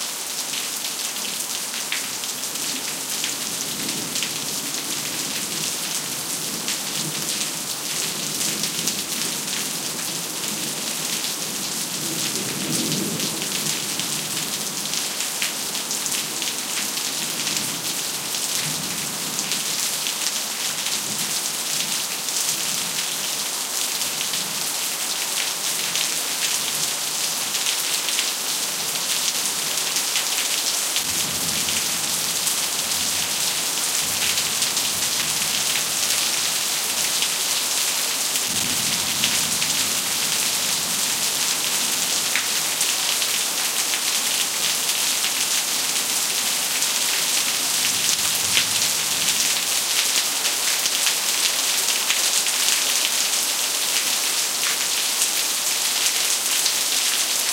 20151101 hard.rain.thunder.06
Raindrops falling on pavement + thunder. Primo EM172 capsules inside widscreens, FEL Microphone Amplifier BMA2, PCM-M10 recorder. Recorded at Sanlucar de Barrameda (Andalucia, S Spain)
field-recording, nature, rain, south-spain, storm, thunder, thunderstorm